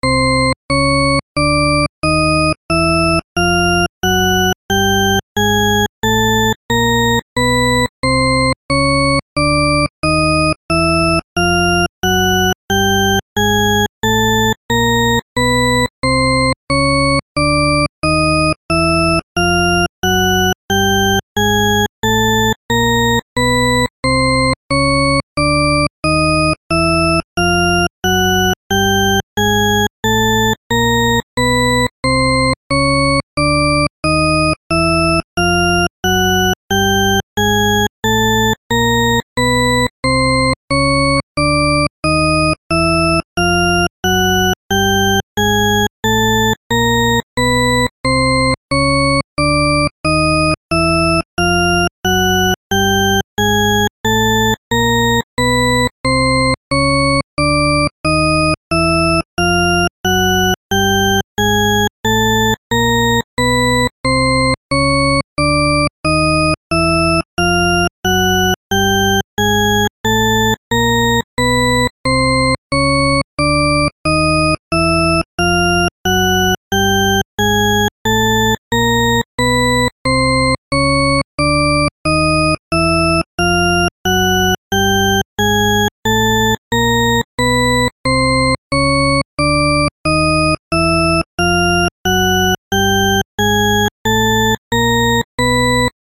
PitchParadox C SineWave Notes Ascending
This is an example of the 'pitch paradox' as notes in a chromatic C scale, listen to the rising pitch, and it seems to keep rising forever, which is impossible, hence the paradox :)
autitory-illusion, pitch, pitch-paradox, rising, shepard-scale, shepard-tone, up